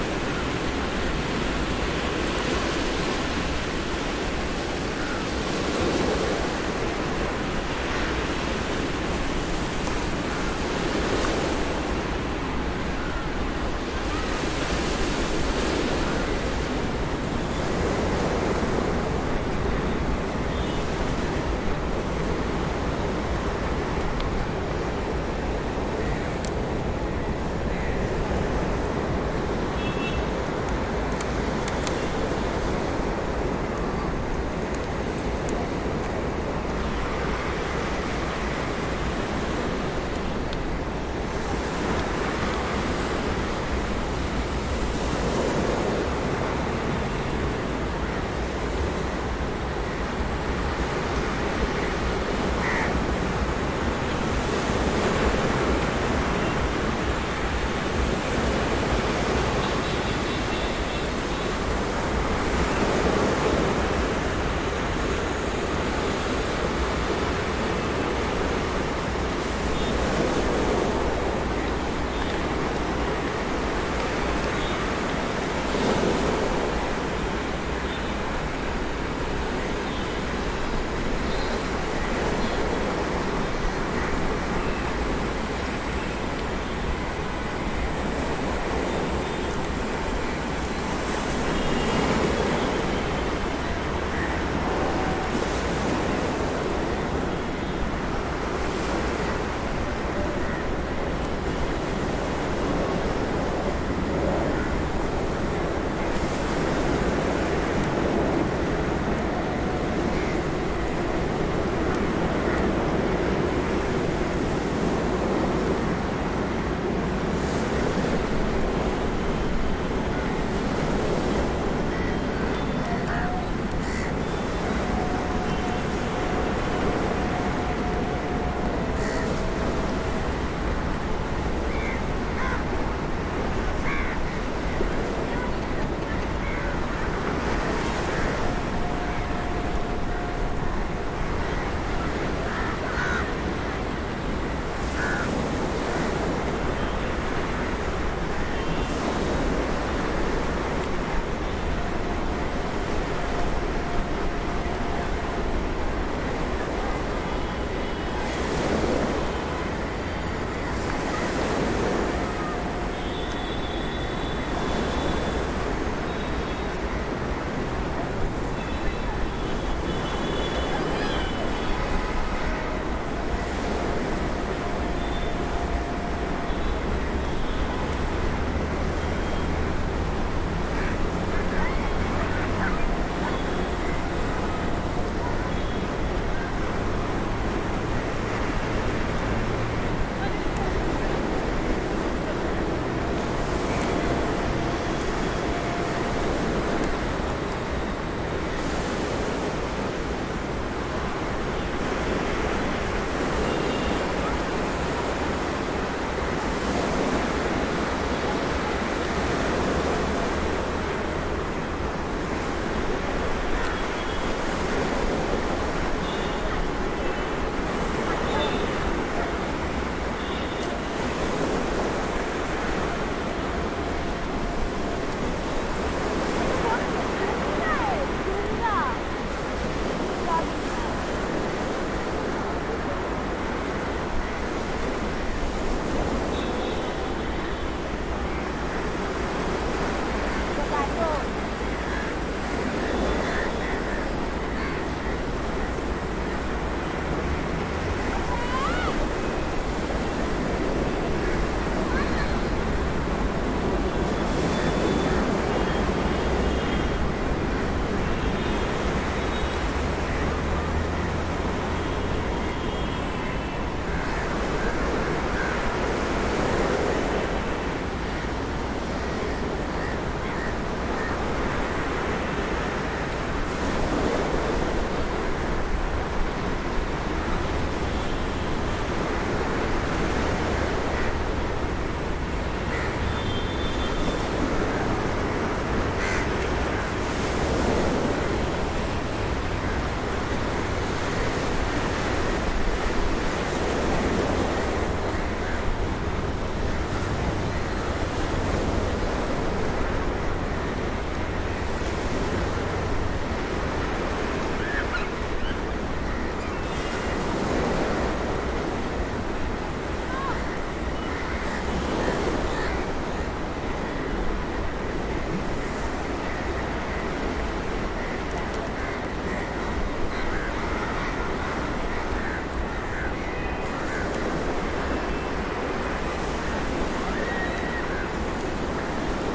ocean waves against sea wall with nearby crows and people and distant traffic horn honks India
against, crows, distant, honks, horn, India, nearby, sea, wall, waves